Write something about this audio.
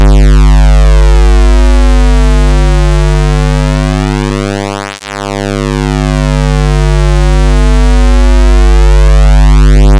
Created in LabChirp using a sqarewave in a frequency of 50Hz and a flanger in 10